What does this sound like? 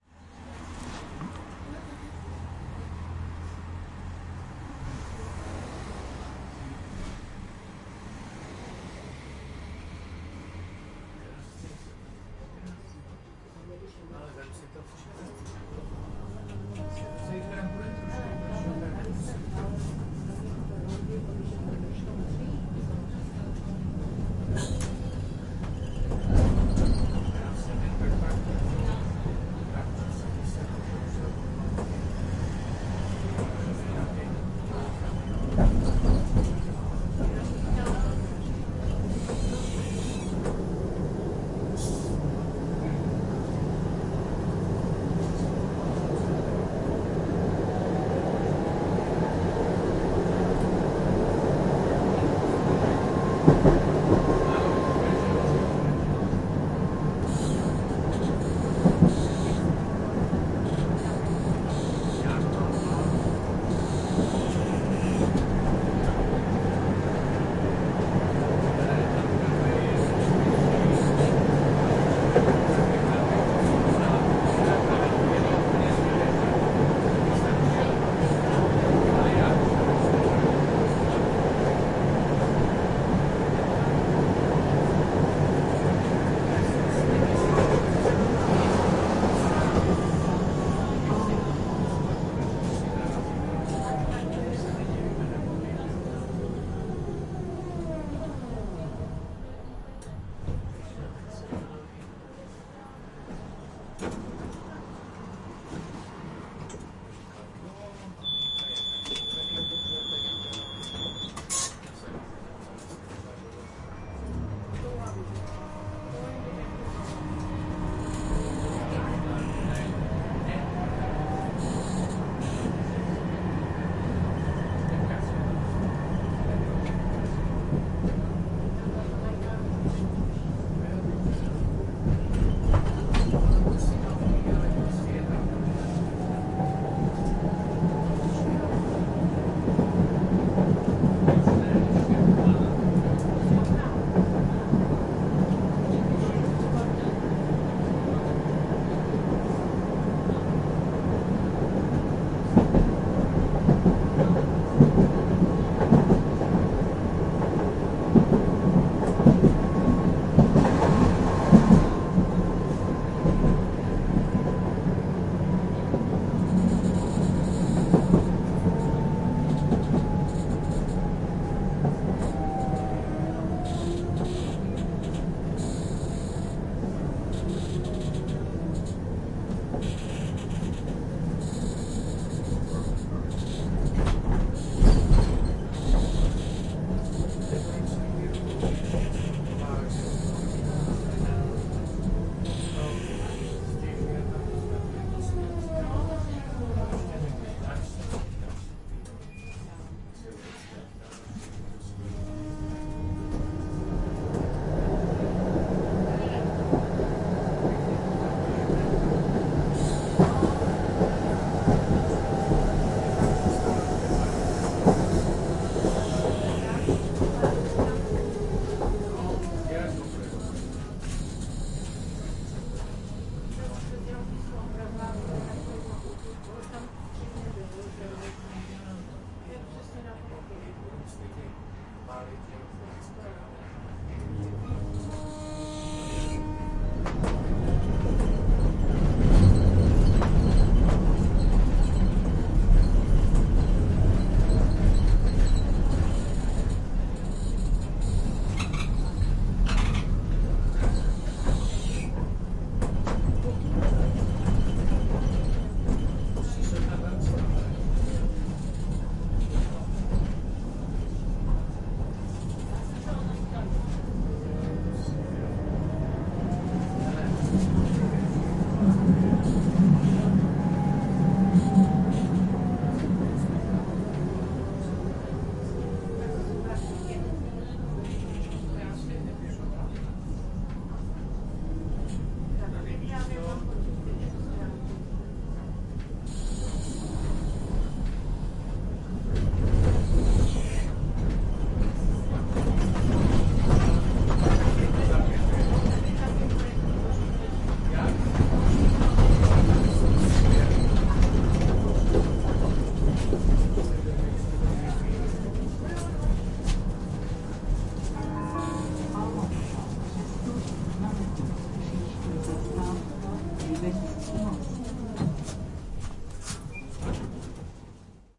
Prague Tram
A tram drive with Line 1 from Ohrada to Palmovka, with announcements and czech voices in the background. Tram doors open and close. Recorded from the inside of the vehicle with an Olympus LS-11.
Czech, Engine, Prague, Republic, Tram, Transportation